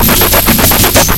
Drum Fill
playin around with the good Ol roland
bent, drums, circuit